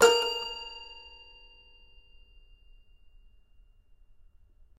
Toy records#15-A#3-02
Complete Toy Piano samples. File name gives info: Toy records#02(<-number for filing)-C3(<-place on notes)-01(<-velocity 1-3...sometimes 4).
instrument, samples, toys, toy, toypiano, piano, sample